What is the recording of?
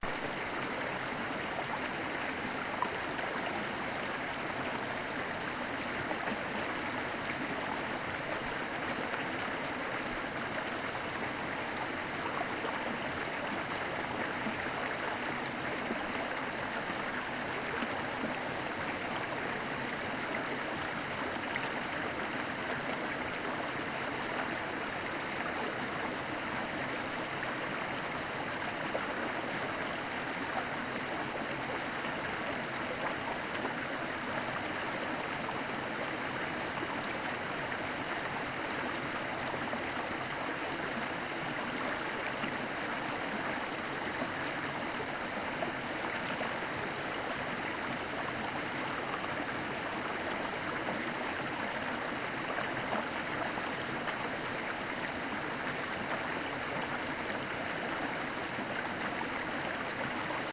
torrent Besseyres 4
water streams recordings
streams, water, recordings